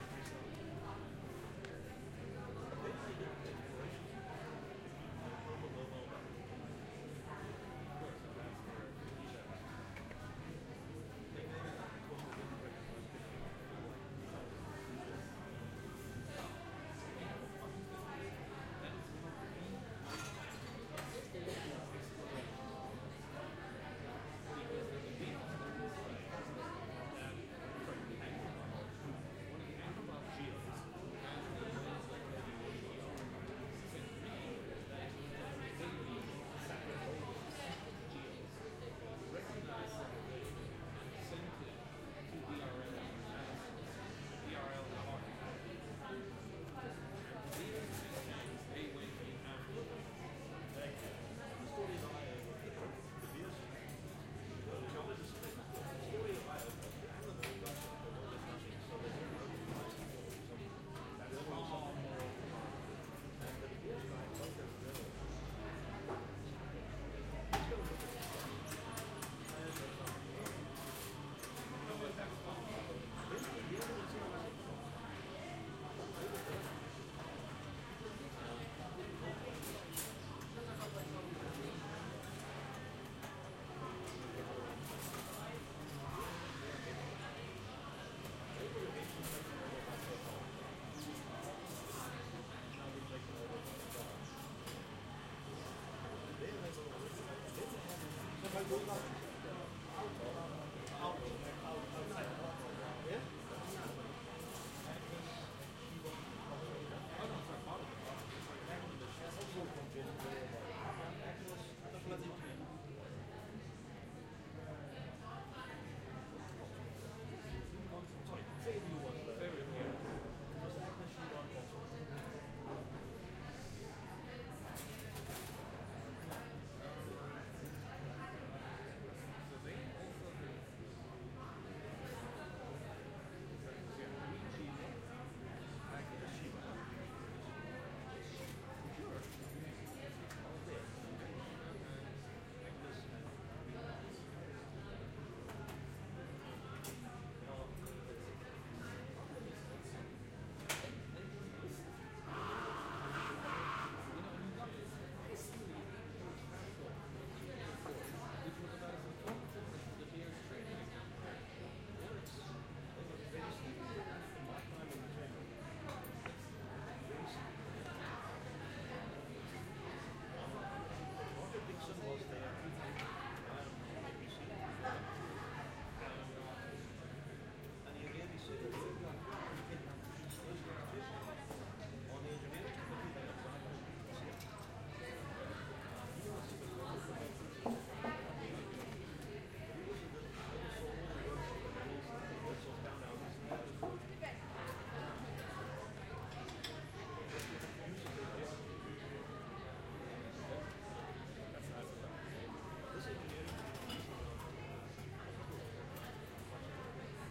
Coffee Shop Ambience
This is the ambient sounds of a busy coffee shop during breakfast time. Recorded with Zoom H6 Stereo Microphone. Recorded with Nvidia High Definition Audio Drivers.
OWI, Coffee-Shop